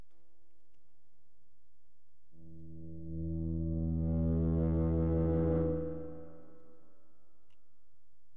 See 1 through 5 if you haven't. The intent was to create an eerie noise by recording feedback noise from the amp using a guitar. Mission accomplished.
amp, feedback, guitar, hum, noise, tone